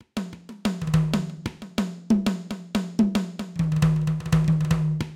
ethnic beat9
congas, ethnic drums, grooves
congas, drum, drums, ethnic, grooves, percussion